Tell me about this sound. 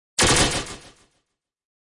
Retro Game Sounds SFX 74
effect, fx, gameaudio, gamesound, pickup, sfx, Shoot, shooting, sound, sounddesign, soundeffect, Sounds